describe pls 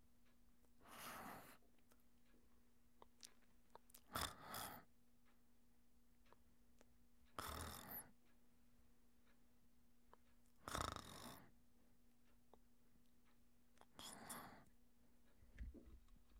A female voice snoring while asleep.
noise, breath, sleeping, air, snoring, snore, female, breathe, sleep, woman, human, breathing, voice